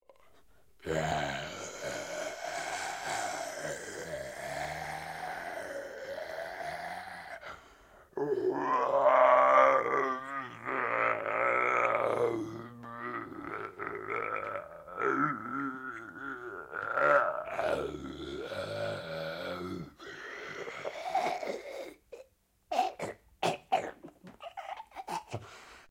Zombie groan
groan, zombie, monster, undead